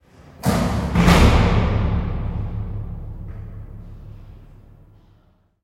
Door Slam 2
There's a staircase where my college radio station is and this door slam gets me every time - metallic slam with big, booming reverb. I posted another version that is just the last booming part of the slam, it's called "door slam 1".
close, closing, door, reverb, slam, slamming